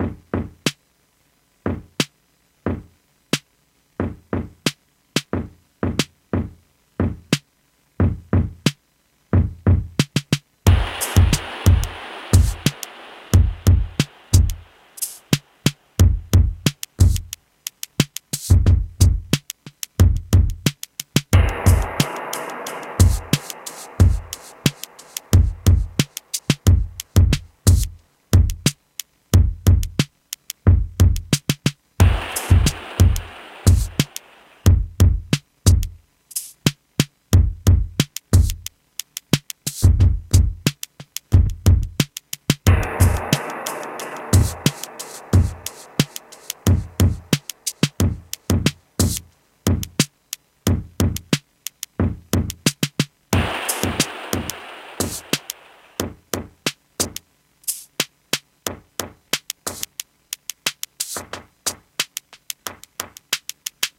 Circuit 11 - Drum 1
Drum Loop
90 BPM
Key of F Minor